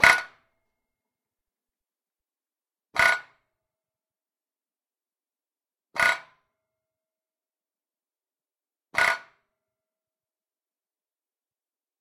Impact wrench - Ingersoll Rand 5040t - Start against metal 4
Chicago Pneumatic model A impact wrench started four times against a sheet of metal.